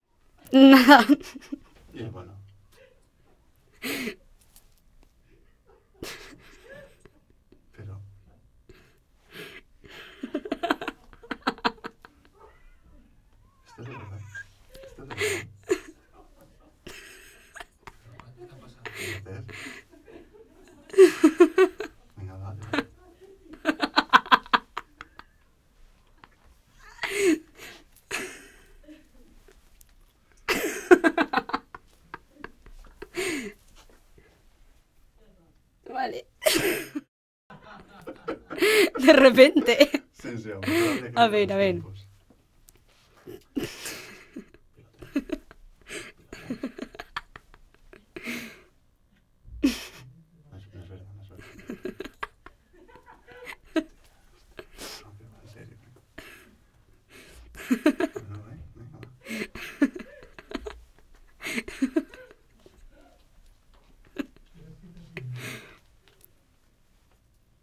Young Woman laughter and giggles. Studio recording
Chatter and laughter of a girl in conversation. Recorded in a studio radio
chatter, female, giggle, giggling, laughter, studio-recording, woman